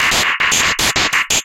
glitch SFX 068
As all files in this sound pack it is made digitally, so the source material was not a recorded real sound but synthesized sequence tweaked with effects like bitcrushing, pitch shifting, reverb and a lot more. You can easily loop/ duplicate them in a row in your preferred audio-editor or DAW if you think they are too short for your use.
abstract, digital, effect, electric, electronic, freaky, future, fx, glitch, lo-fi, loop, machine, noise, sci-fi, sfx, sound, sound-design, sounddesign, soundeffect, strange, weird